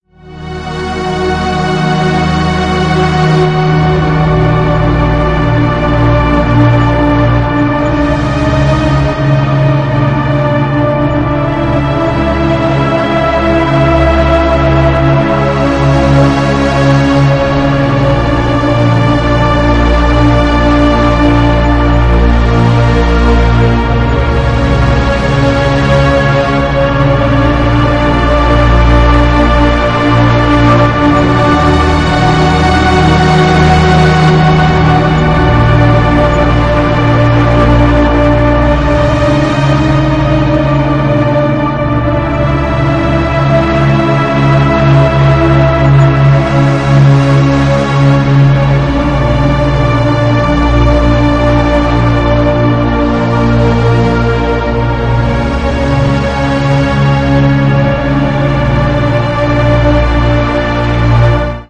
ancient music for game or film by kris klavenes played on keyboard v2
ancient-music, sad-music